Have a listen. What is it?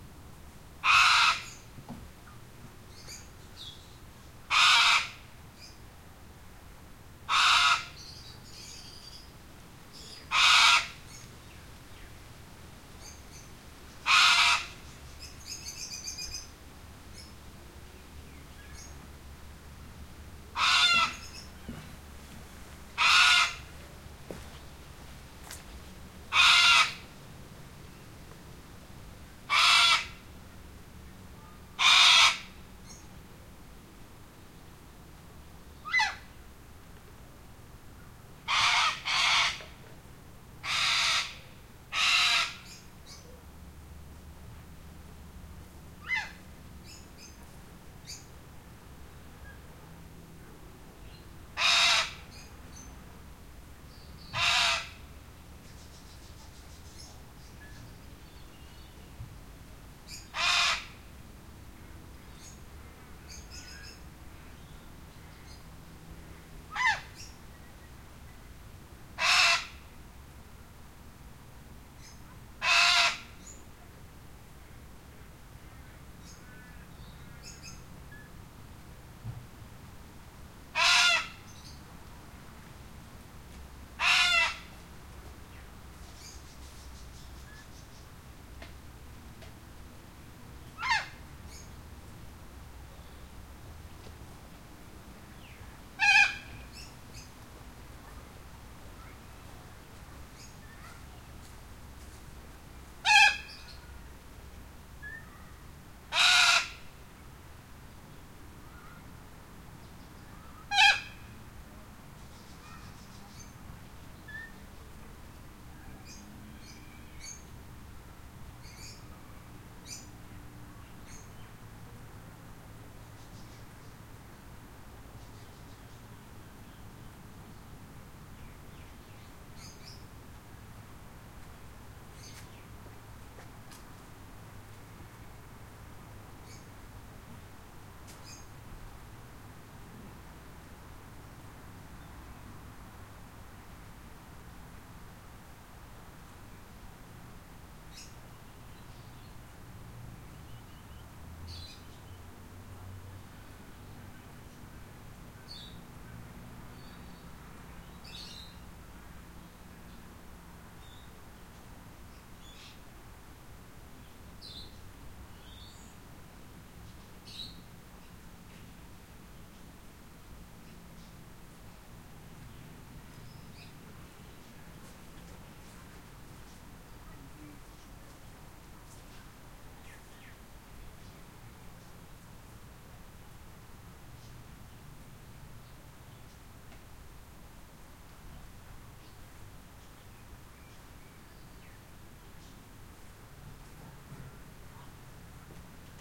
AJR0081 backyard atmos lone cockatoo calling for first half
Recorded in my backyard. A single cockatoo sitting in a tree calling for the first half of the recording, then just background atmos.
ambience, atmos, atmosphere, background-sound, backyard, cockatoo, natural